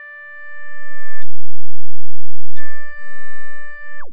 Multisamples created with subsynth using square and triangle waveform. The sound is clipped but it makes a nice picture...
square, triangle, subtractive, multisample, synth